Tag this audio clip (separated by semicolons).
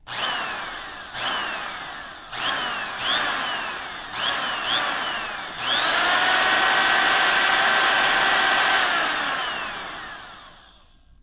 mechanical; power; motor; electric-tool; electric; machine; drill; drilling; motor-drill; tools; tool; carpenter